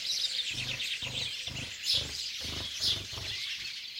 Wings fluttering (by a Blackcap)